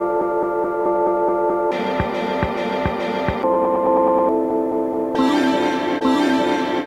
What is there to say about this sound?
Bend Upwards (140 bpm)
Tape music, created in early 2011